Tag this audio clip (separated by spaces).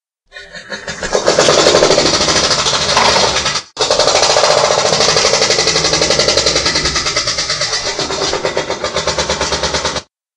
Street sounds field roadworks recording mobile RecForge